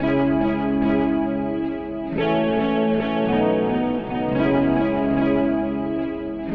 GUITAR-DELAY
gibson guitar delay fx boss